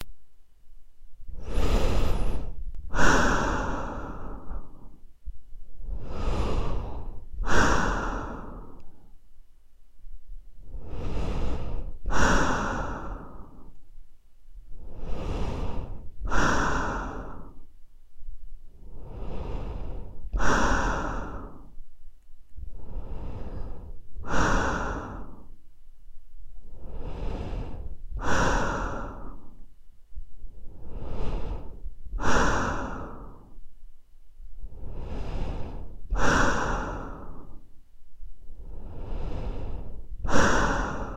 Breathing recorded inside the soviet GP-5 Gas mask